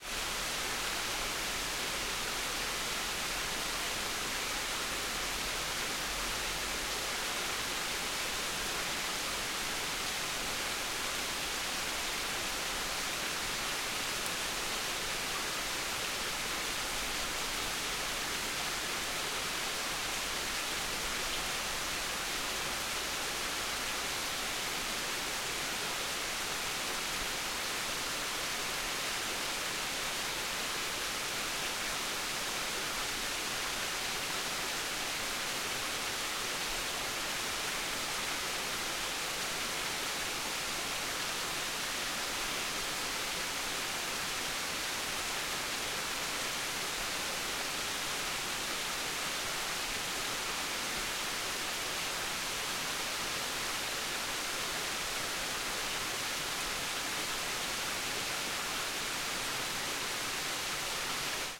Ambi - Small stream - some distance - Sony pcm d50 stereo Recording - 2010 08 Exmoor Forrest England
Ambi, ambiance, exmoor, forrest, small, stereo, stream